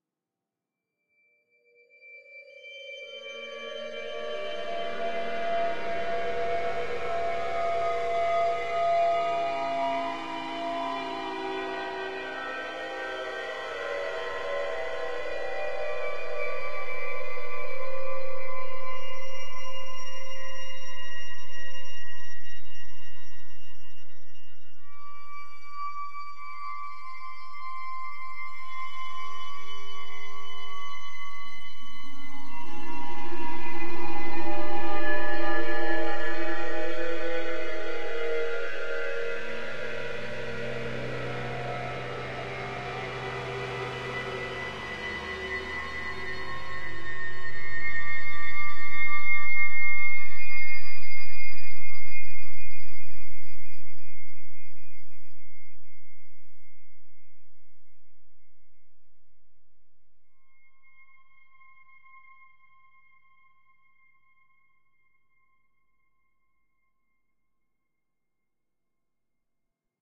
archi soundscape space3
Instances of Surge (synth), Rayspace (reverb), and Dronebox (Resonant delay)
Sounds good for scary scenes in outer-space.
ambiance, ambiant, ambience, ambient, atmosphere, drone, evil, horror, scary, soundscape, space